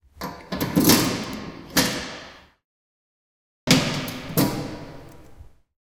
Empty Letter Box
Opening a metal mail box with a key, finding it empty and closing it.
open, close, mail, container, echo, mail-box, keys, lock, box, letter-box, metal, hallway, unlock